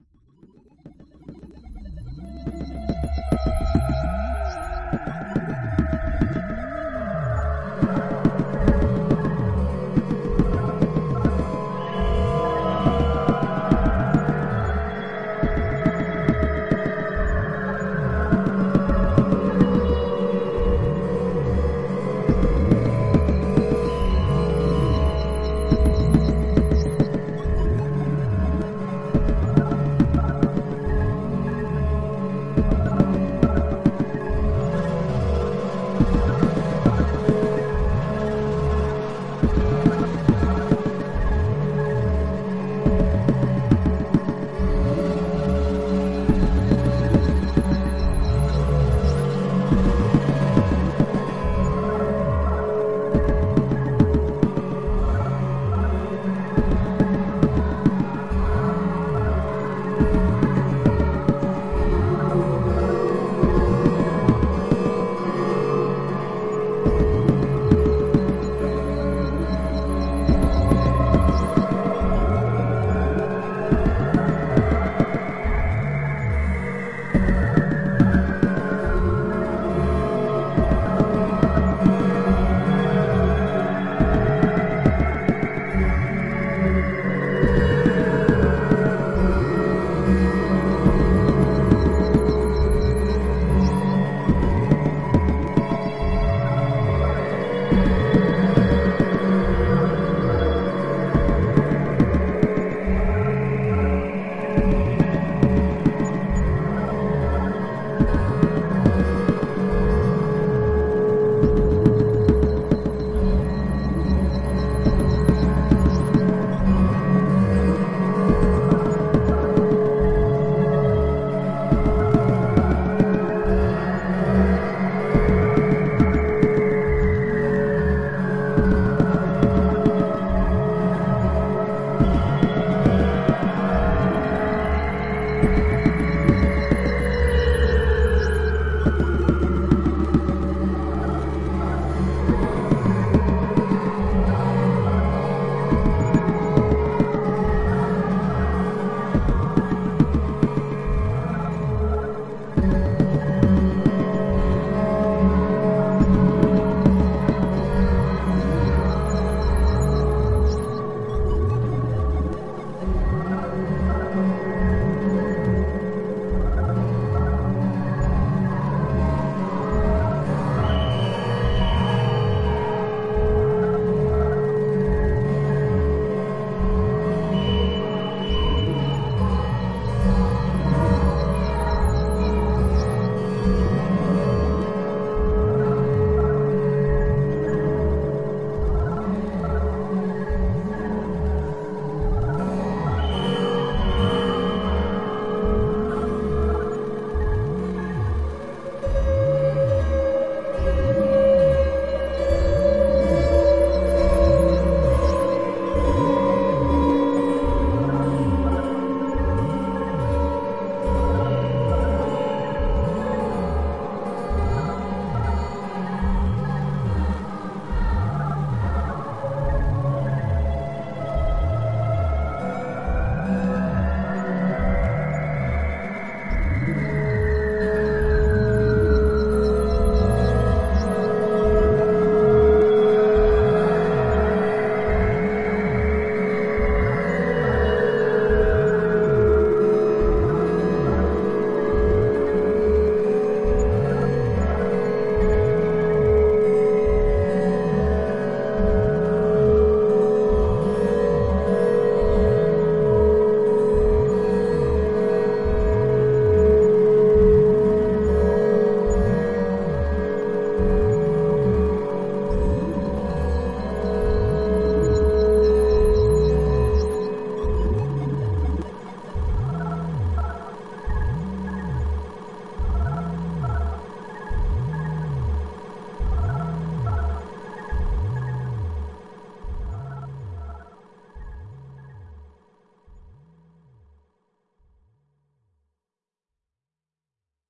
The Mind Lives on
A New Age tune with guitar, clarinet and Ukulele. Many effects mastered to sound well through either speakers or headphones, although I think to get the full pan experience headphones are better. It's a chillout track that would fit well with 140 bpm track.
background-music, Guitar, atmospheric, Chillout, New-Age, Distortion, cockatiel, strange, 70-bpm, The-Mind-Lives-On, tweeting, gaming-music, Flange